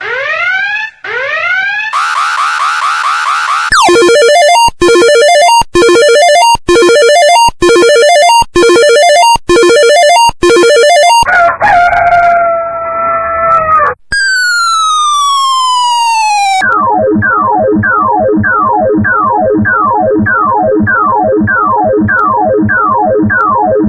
Content warning
The sound of my toy rocket plane which definitely makes this noise and just be warned: this contains explicit content (Only for alarming) which may cause mental illness